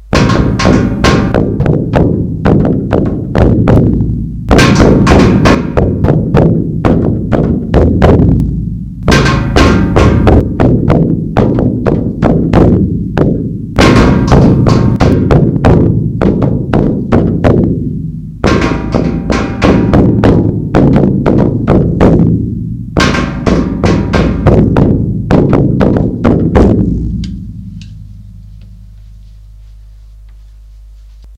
Drums, special design, are tested.Basics.